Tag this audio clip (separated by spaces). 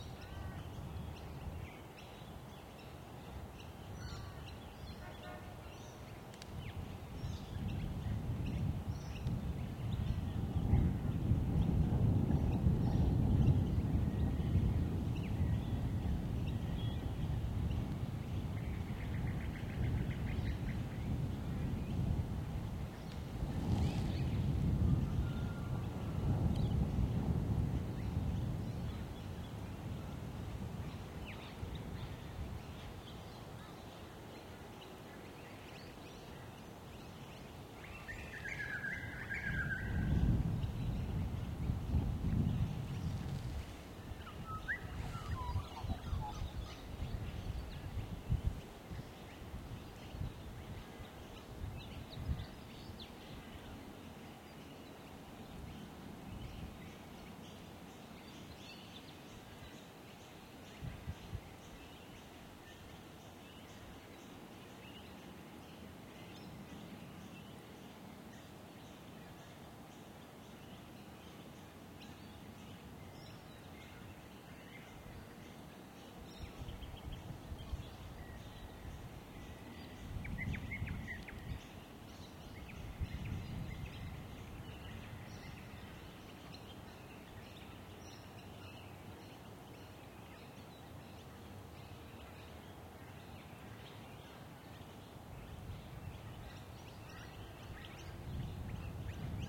field-recording mudflats nature